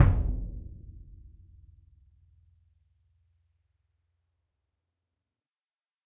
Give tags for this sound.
bass
concert
drum
orchestral
symphonic

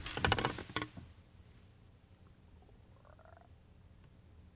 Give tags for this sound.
chair
creek
foley
sit
soundeffect

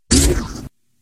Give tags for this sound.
alien
fi
sci
weird

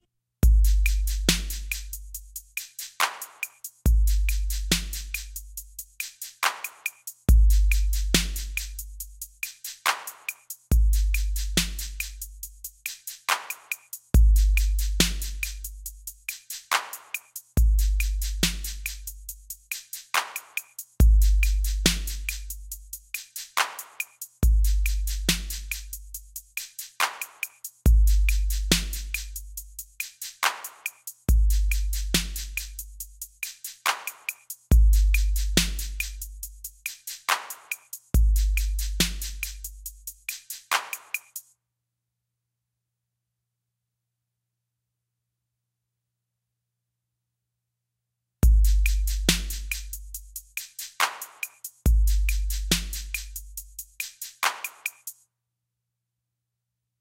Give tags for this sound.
High
Time

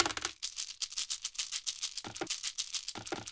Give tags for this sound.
145bpm
bleach
145
ice
dice
shake
crunch
dices
loop

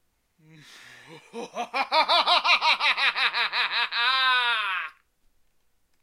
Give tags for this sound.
cackle
evil
solo
laugh
single
male

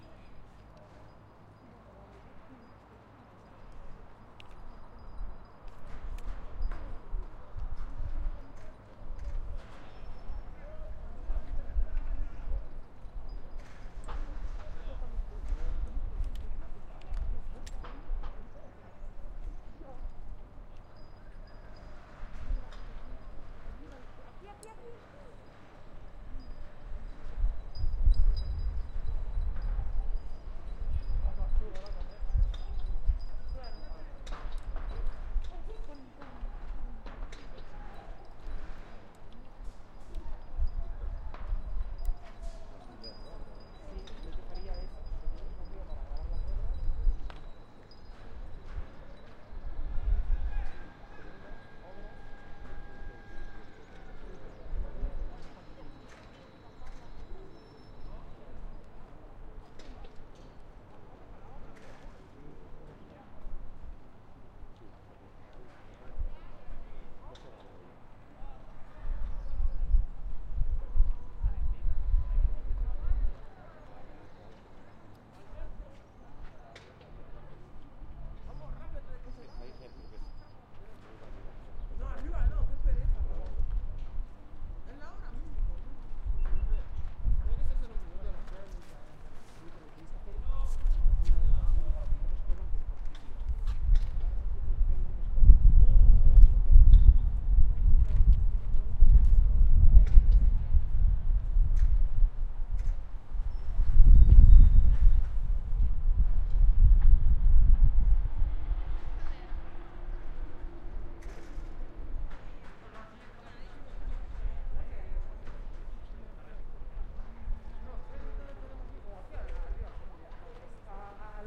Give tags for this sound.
Complex
Humans
Nature
Nice
Transit

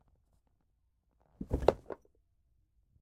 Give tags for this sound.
floor
heavy-bag
wooden

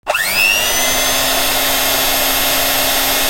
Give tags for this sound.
motor
sunbeam
beatermix
appliance
electric
kitchen
beater

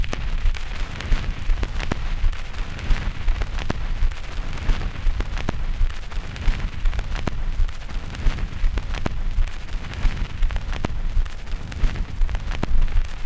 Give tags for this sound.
noise
vinyl
album
turntable
record
surface

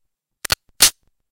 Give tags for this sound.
glock
being
slide
racked

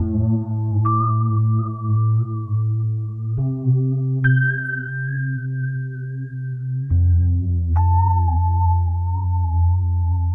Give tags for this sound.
rhodes
ambient
wobble
loop

